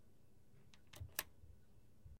Button Press
Plastic button on a stereo being pressed.
button, press